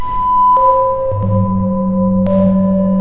futuristic doorbell2020
The original sample of several different door bell sounds, a synthetic door bell that does not sound like chimes, but more
like keyboard sounds.